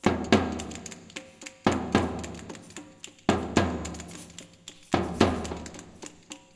SPANISH DRUMS EDIT 1b
(Additional) Music by Christopher Peifer